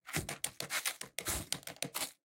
Cracker Foley 2 Far
Graham cracker foley recorded with a pair of mics in XY stereo arrangement (close), and small diaphragm condenser mic (far) running parallel. Processed in REAPER with ambient noise reduction, compression, and EQ. Each file mixed according to the title ("far" or "close" dominant).
cookie, cookies, cracker, crackers, crumble, crumbles, crumbling, design, dry-bread, dust, dusting, effects, foley, food, foods, footstep, gamesound, gingerbread, graham, pop, sfx, sound, sound-design, sounddesign, step, steps